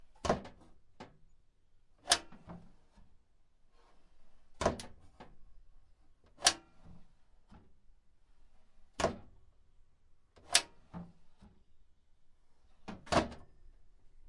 Metal lid closed and opened
Metal lid being opened and closed.
metal, opening, field-recording, door, closing